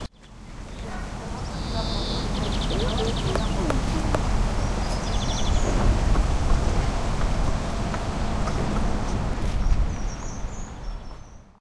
El meu company i jo vam fer una excursió fa molt poc. Vam anar a una zona natural del prat de llobregat. I vam escoltar diferents cants d´ocells.